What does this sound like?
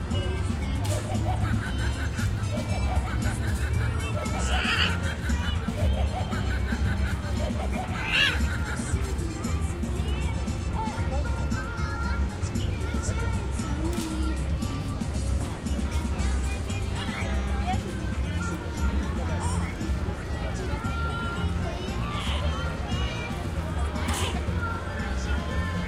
Sounds in the recreation park of the 30th anniversary of the Komsomol. Adults with children. Voices of children. Loud music. Noise. Voice of parrots (they are present to making photographs)
Recorded: 2013-08-17.
XY-stereo.
Recorder: Tsacam DR-40
children, rumble, park, ambience, city, field-recording, town, atmosphere, people, ambiance, sound, parrot, playday, holiday, voice, noise, soundscape, bird, music, ambient